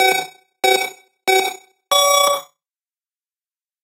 Race Start Ready go
Done with a synthesizer and some pitching. I would be happy to hear where you will use this sound! :) 3...2...1... go! begin